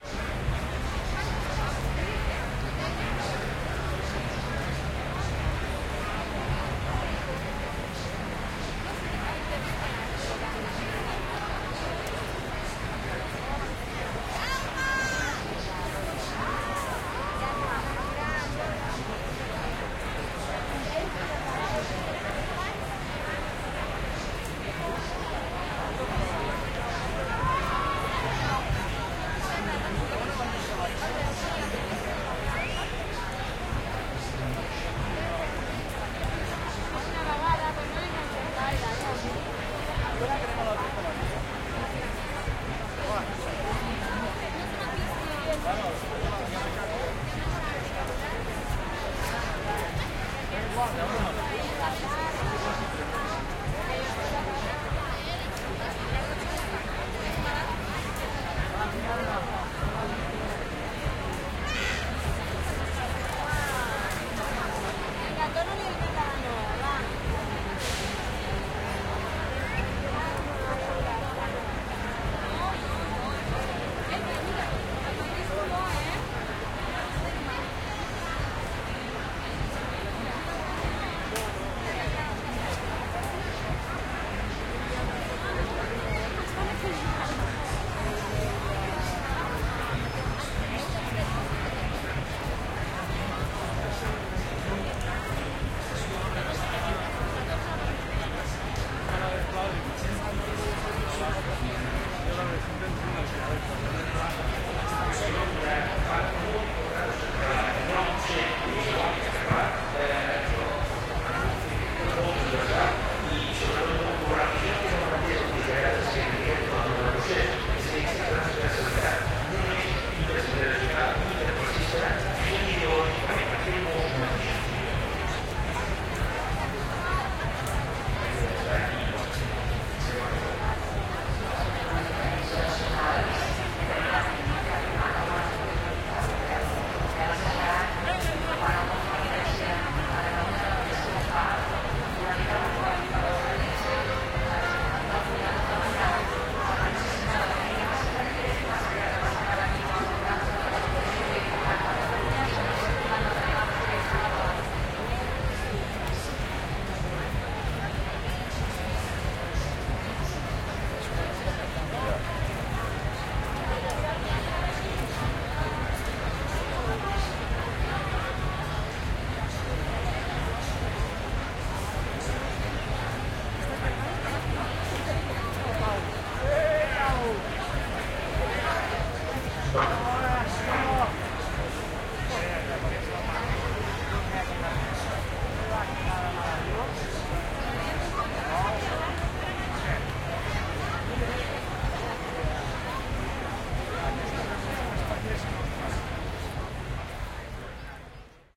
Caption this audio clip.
BUSY STREET CROWD AMBIENCE EXT BARCELONA 11 SEPT 2015 RADIO IN BACKGROUND
Busy street city ambience people Barcelona Catalunya
ambience, Barcelona, Busy, Catalunya, city, people, street